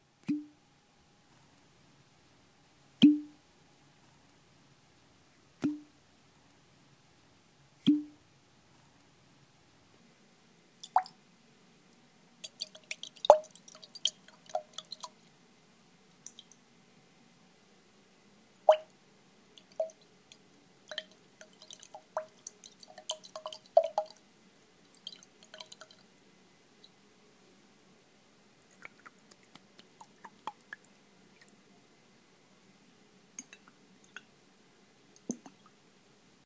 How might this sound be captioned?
Four takes at the beginning of the plopping of a small bottle with a cork lid being opened, then shaking the bottle slightly to hear the liquid inside.